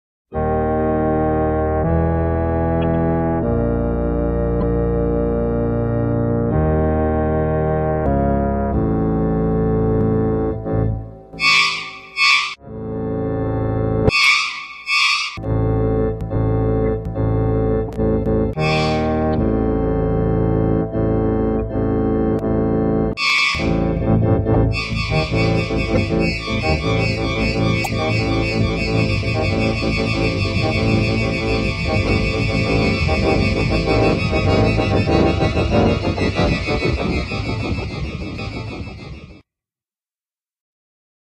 Halloween - Organ Music
organs good for halloween
Halloween, organ, sound